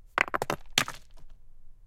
Stein Aufschlag mit langem Decay 03
Recorded originally in M-S at the lake of "Kloental", Switzerland. Stones of various sizes, sliding, falling or bouncing on rocks. Dry sound, no ambient noise.
bouncing, close-miking, hit, movement, nature, sliding, stone